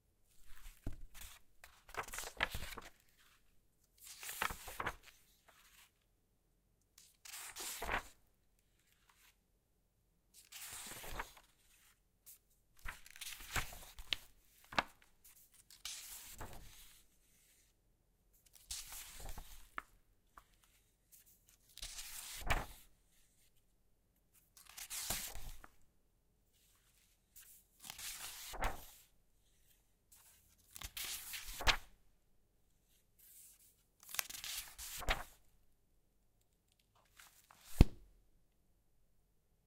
Turning Pages
Turning several pages of a book before closing it. Recorded with a Zoom H4.
Book, page, pages, turn, turning